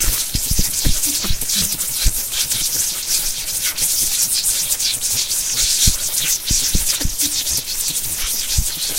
Just electricity. Was inspired by chidori effect from anime Naruto.